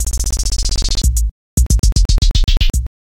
more experiment with LiveCut beta 0.8the new "Live BreakBeat Cutting tool"from mdsp @ Smartelectronix.. simple 808 beat (2 bar 4/4) treated with the warp mode